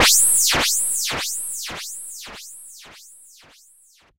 weird FX 2
Frequency modulation weird sound.